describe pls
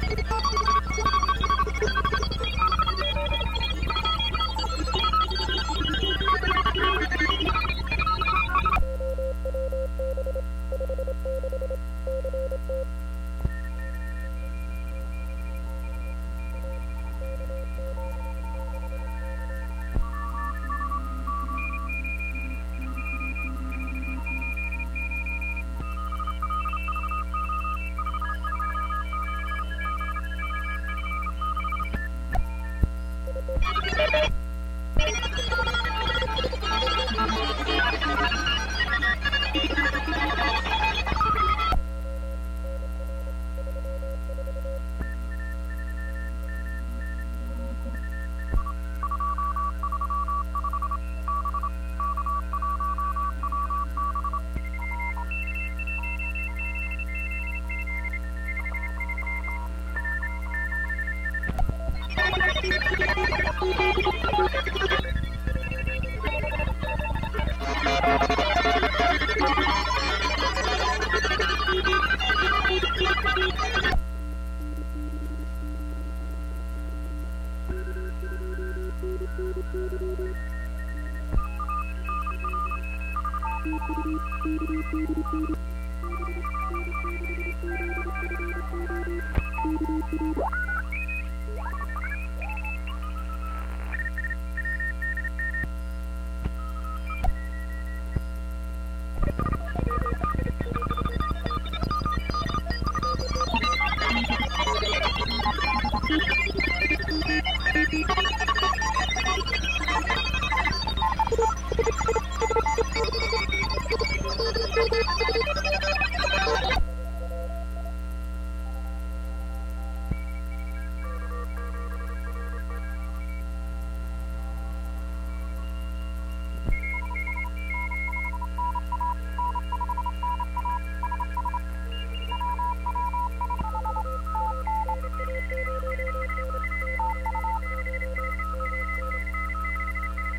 This is a recording of a lot of morse code conversations on the 40 meter band of Amateur radio.
Mainly between 7 - 7.012 MHZ using LSB, USB, CW wide, CW narrow, and AM filters.
I used a Yeasu FT-757GX transceiver
I mainly recorded this because I thought the AM filter on the morse code sounded very unique and space like.
Also the buzz is from the computer's sound card impurity being amplified through the transceiver, this is not easy to fix.
Recorded on 3:50 UTC 2013-11-2